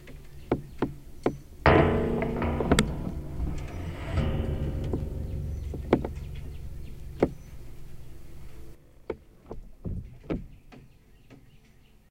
door spring01
Contact mic on a door with a spring
birds, boing, metal, metallic, piezo, spring, twang